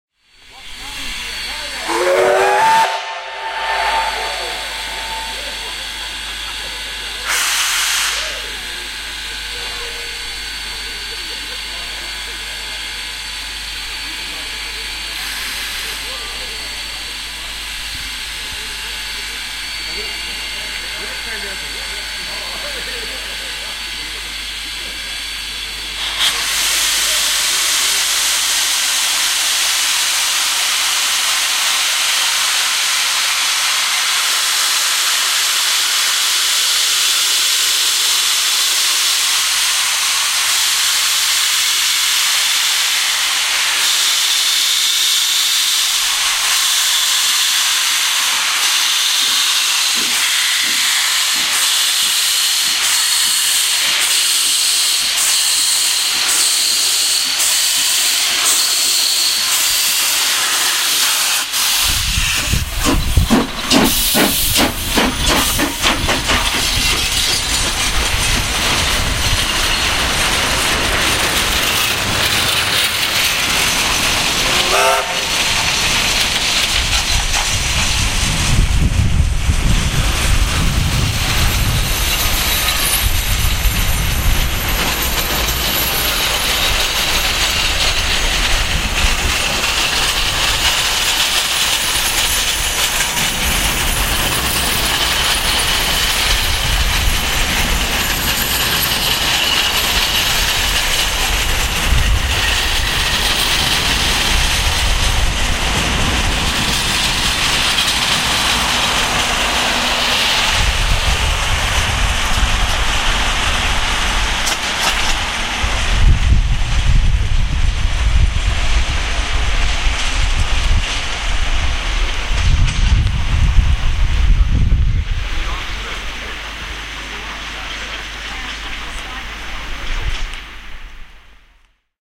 Ja Loco 13mp3
New Zealand Ja Class Locomotive coming into, stationed at and leaving Hamilton Station. Homeward bound to Auckland (Glennbrook) after a joint day trip to National Park and back. Recorded in very cold conditions with a sony dictaphone, near 10pm NZST.
locomotive, steam-train